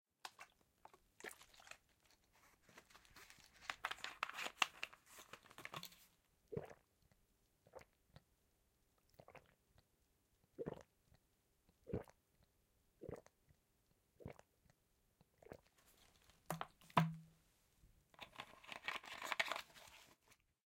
Bottle & Gulps
Recoreded with Zoom H6 XY Mic. Edited in Pro Tools.
A person opens a bottle, then drinks, gulps and closes it.
bottle, drinking, organic, water